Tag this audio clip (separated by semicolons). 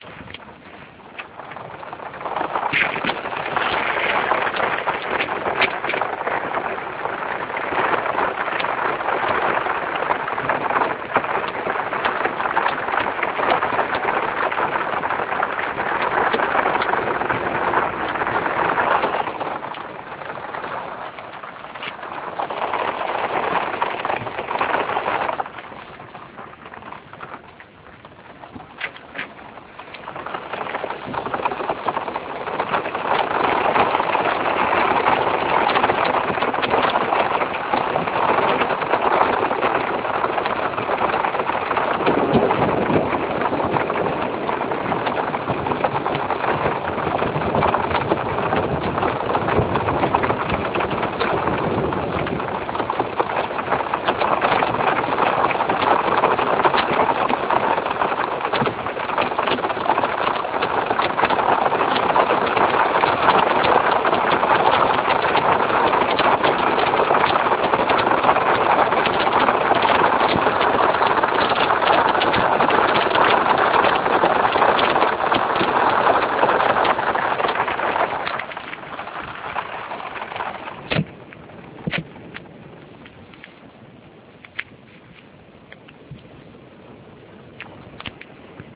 rain; thunderstorm; weather